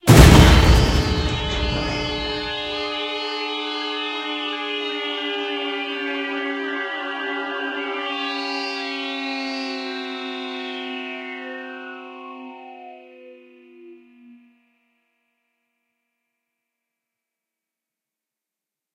This is a jumpscare sound for any sort of horror videogame.
As of March 21, 2025, this sound is now used in my game, Comstruct.
Creepy, Scary, Videogame